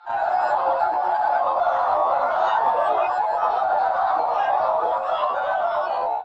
scream conv chaos mix
The scream male_Thijs_loud_scream was processed in a home-made convolution-mixer (Max/MSP) where it was mixed with the convolution of it's own sound, but at different times. This is a cluster of different resulting sounds.
convolution, fx, noise, processed